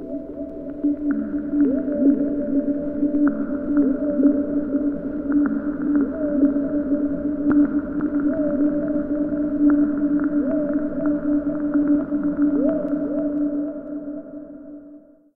hf-7306 110bpm Tranceform!
An aquatic electronica interlude made with TS-404. Another one that would do well in a worldbeat or chillout song.
chill, chillout, electronica, interlude, electro, loop, world, ts-404